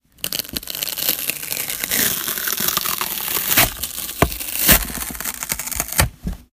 rip-tear-cardboard-box-slowly
10.24.16: This would make a great sound effect for biting through a wafer or something crunchy. Slowly tearing a panel off an empty cardboard box (formerly a 12 pack of soda). Because of proximity effect, the high frequencies of the tearing are through the roof!
apple, bite, board, box, break, card, card-board, cardboard, carton, chew, chewing, chips, crunch, crunching, crunchy, eat, eating, foley, food, handle, hit, munch, munching, natural, pack, package, rip, snack, tear, wafer